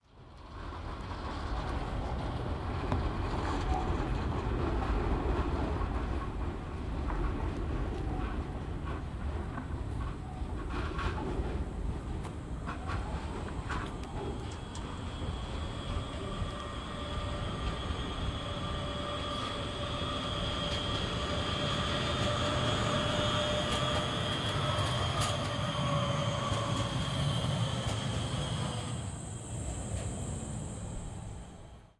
Just a tram passing by.